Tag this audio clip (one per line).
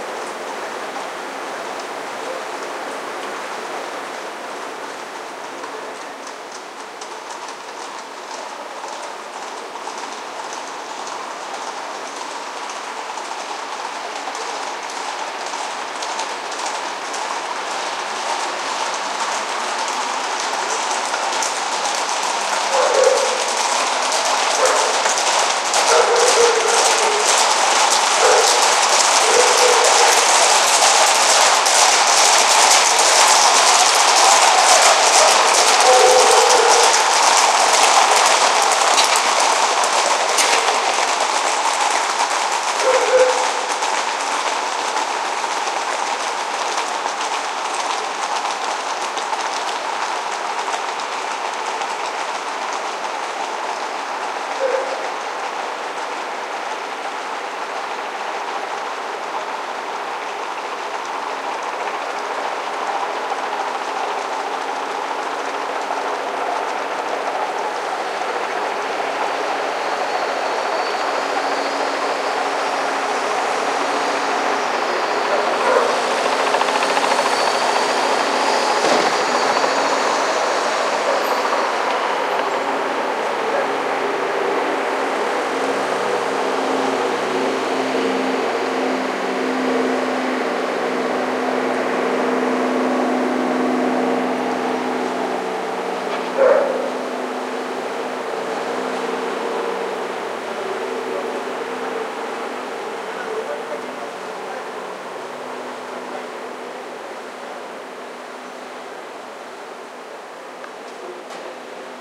ambient
gallopade
anticopa
field-recording
riot
manifestations
horses